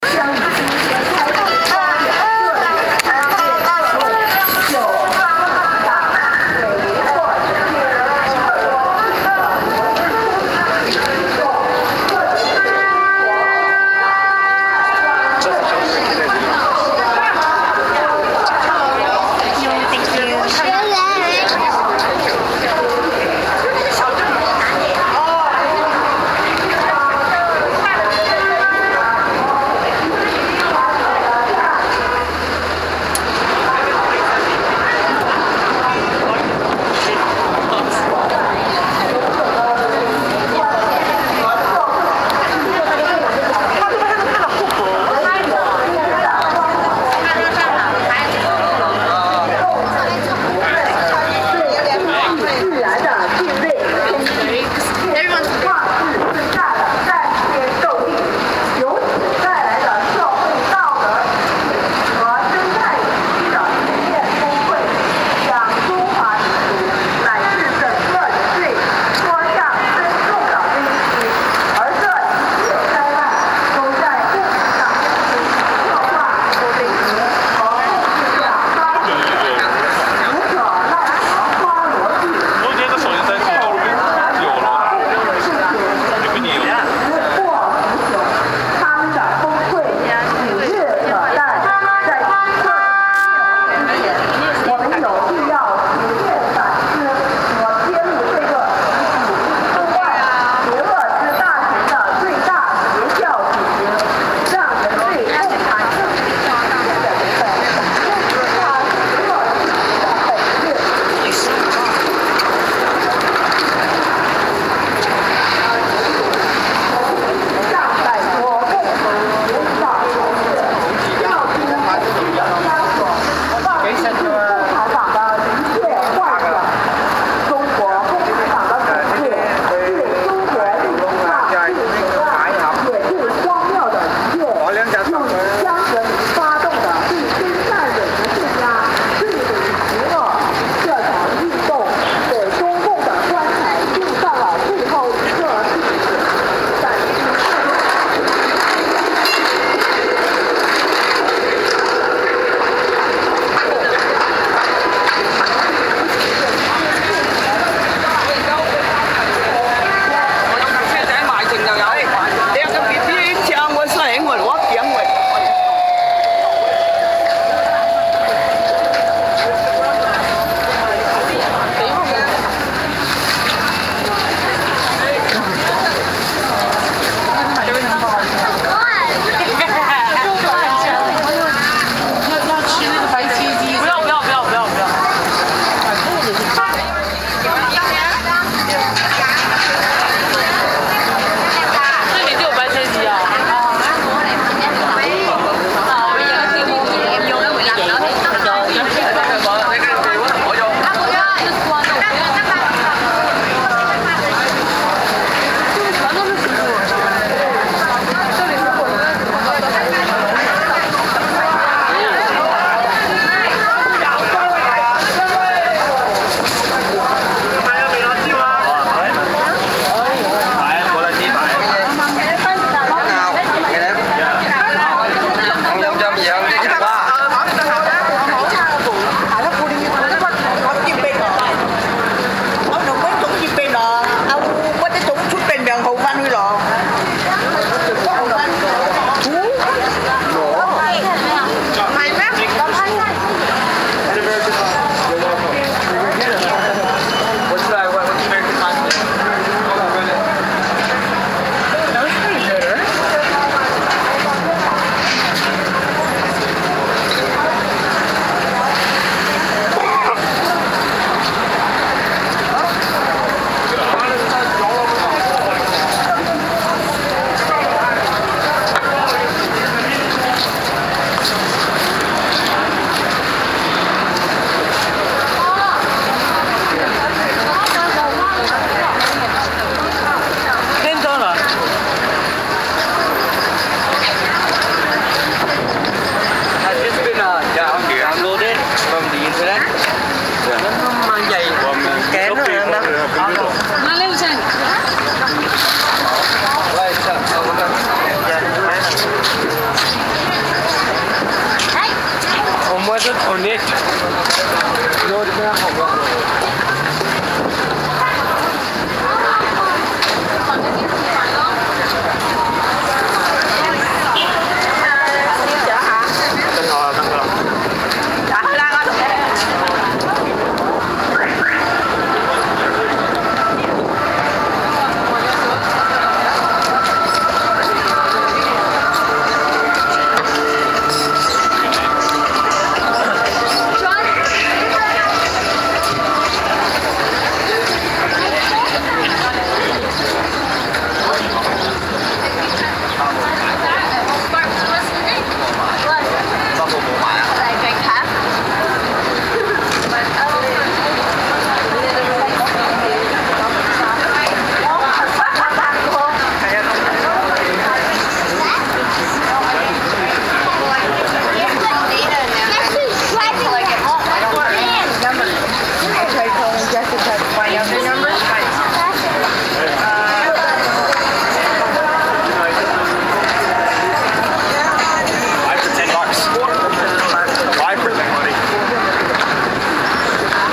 Walking through Chinatown. Mono.I know it looks like it's a mess of clips, but it isn't.
city, chinatown, field-recording